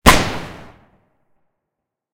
Homemade Gunshot 2
This is a gunshot from a series of 4 created using only household objects and myself.
explosion, gunshot, pop, weapon, gun